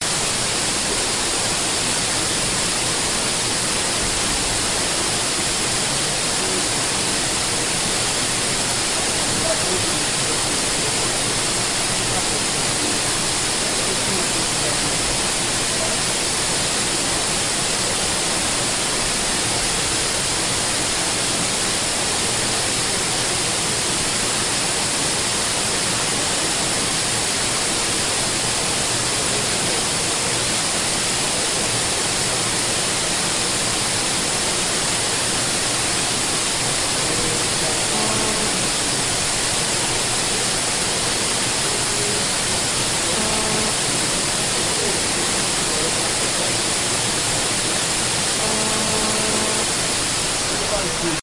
sound of a waterfall as heard from above